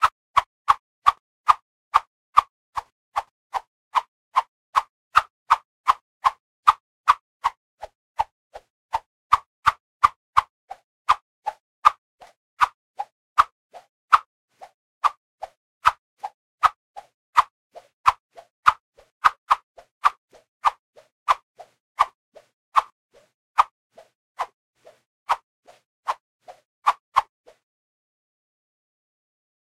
Quick Whoosh 2
Ready for round 2? Be sure to give faster impacts with your bare fists!
(Recorded with Zoom H1, Mixed in Cakewalk by Bandlab)
Whoosh, Fast, Martial-Arts, Battle, Disappear, Speed, Quick, Magic, Combat, Flying-Fists